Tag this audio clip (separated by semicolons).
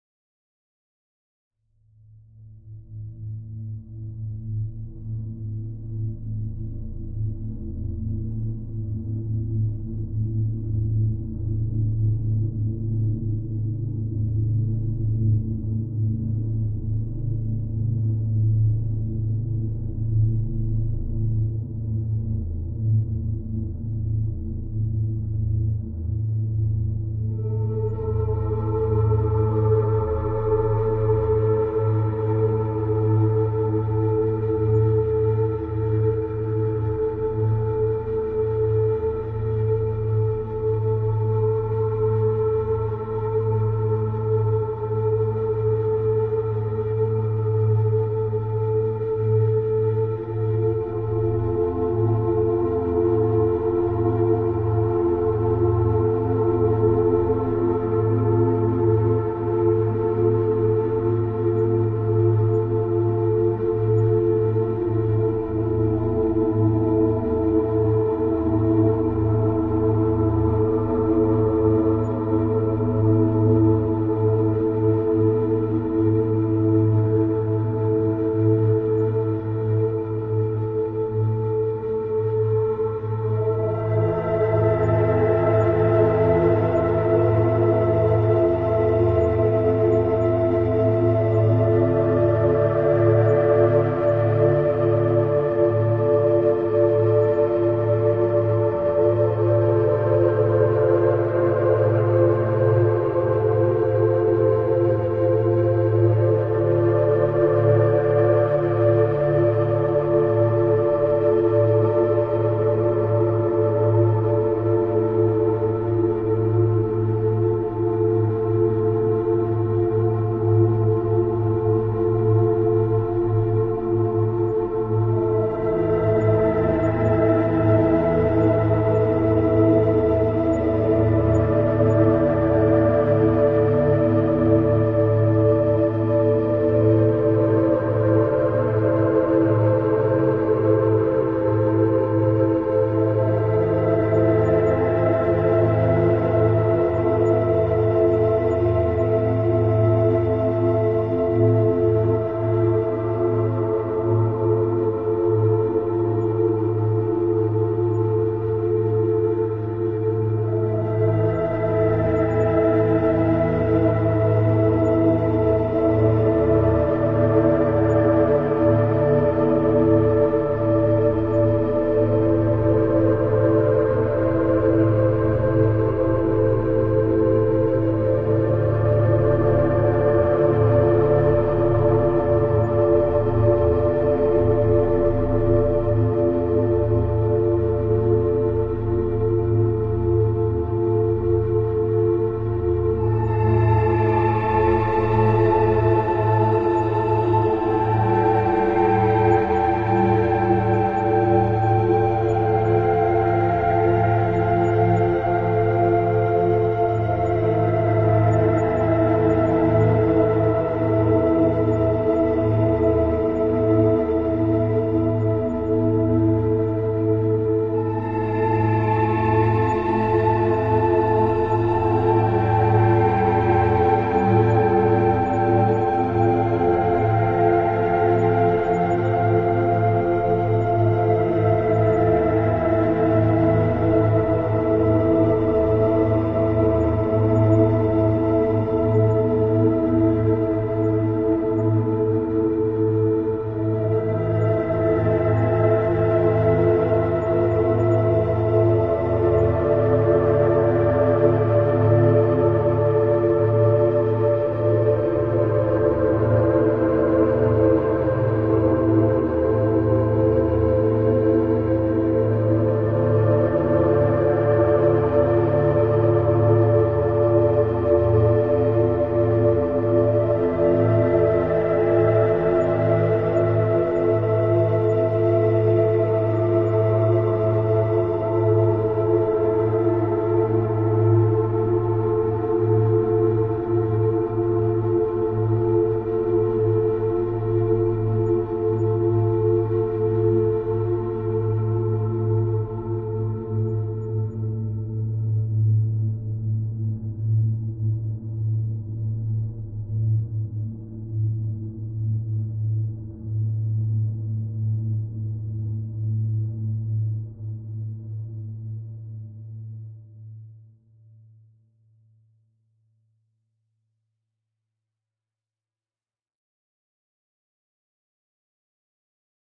long; loop; music; pads; relaxation; soundscape; sweet